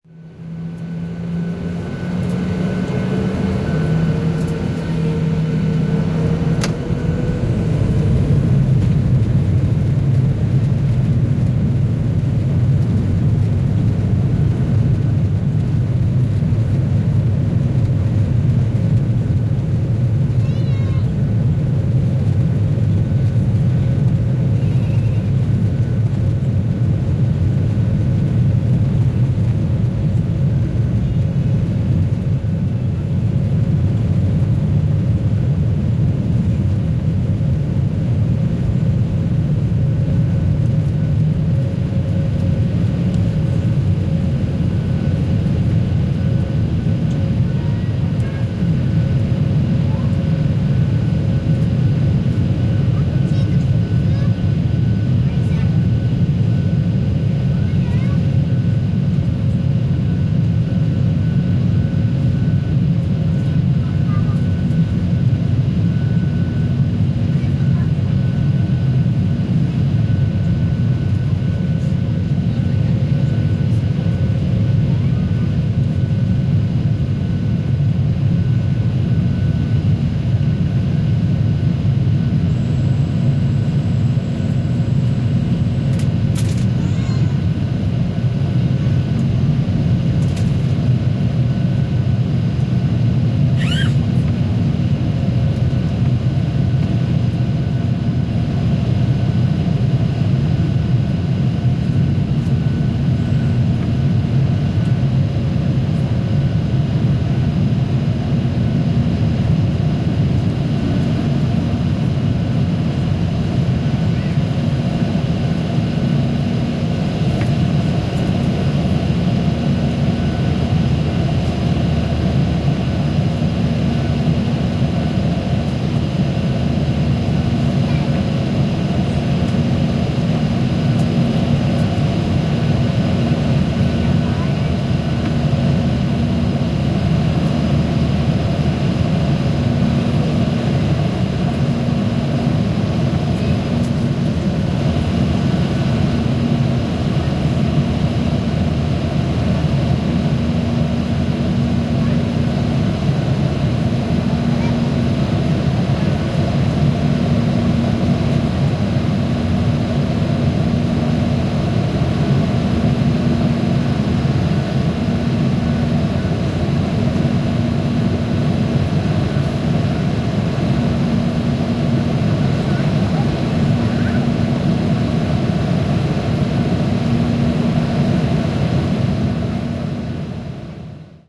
Aeroplane Takeoff From Cabin
Passenger plane takeoff (737 I think) from inside the cabin. Leaves ground at 40s, gradually enters the stratosphere and begins cruising. Some faint voices from passengers. TUI flight to Manchester from Reus, Spain Aug 2018. Galaxy S8 internal mics > Adobe Audition.
cabin, aircraft, drone, reus, cruise, airport